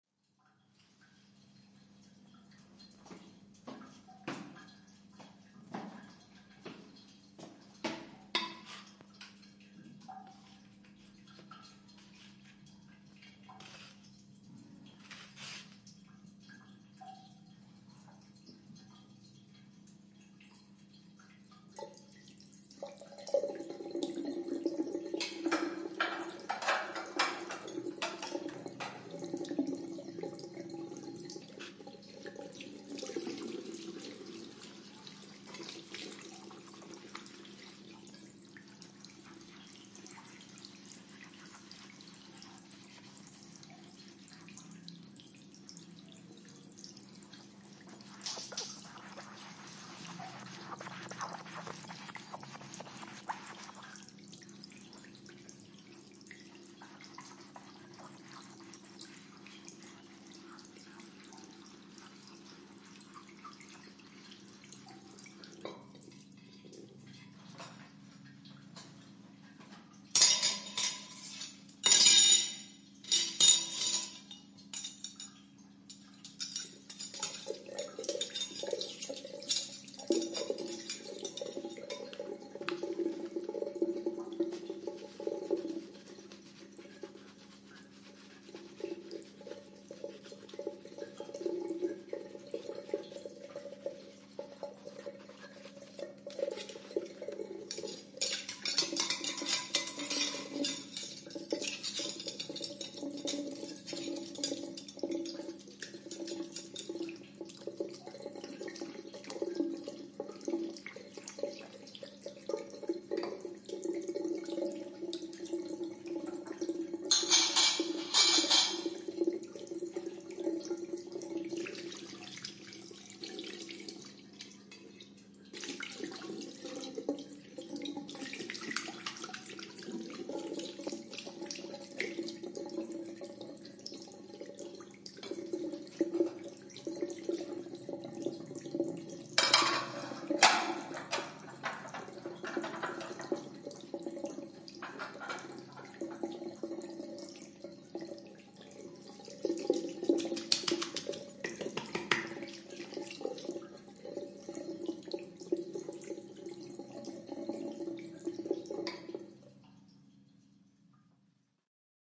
Bunyi no.6 piring nyuci ver 2
washing ambience fx